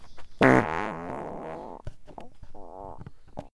some high quality farting sounds recorded with Tascam DR-07x